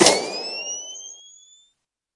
this is made with granular synthesis using a short white noise and a record of a old camera flash light
spotlight, futuristic, badland, flash, machine, designers, videogame